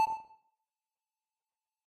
one beep
beep, 8-bit, 8bit, heartbeat, health, retro, game
8bit, health, 8-bit, game, heartbeat